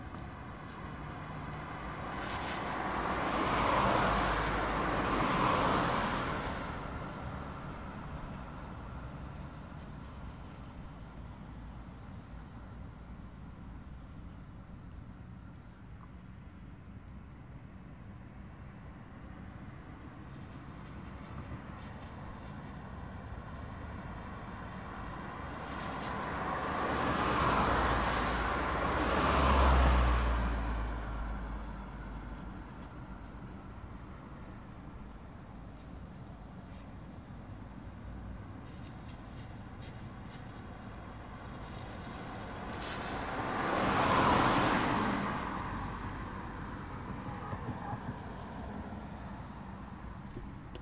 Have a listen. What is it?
car passing by
cars passing by
by, car, pass, passing, road, vehicle